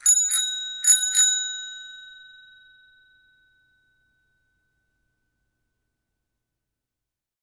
Bike bell 05
Bicycle bell recorded with an Oktava MK 012-01
bike, bell, bicycle, ring